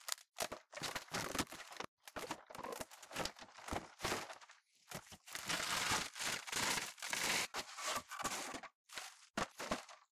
Wood panel fence fumble move
Part of a series of sounds. I'm breaking up a rotten old piece of fencing in my back garden and thought I'd share the resulting sounds with the world!
fumble, fence, move, Wood, panel